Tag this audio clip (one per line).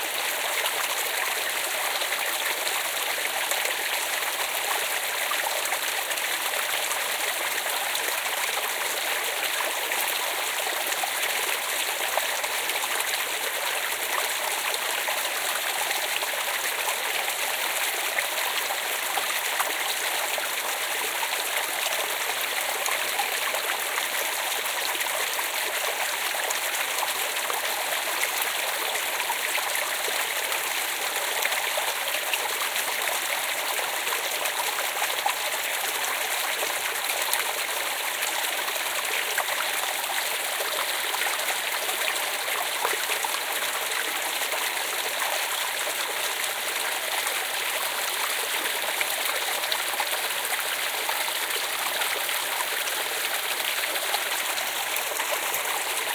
forest
brook
flowing
water
creek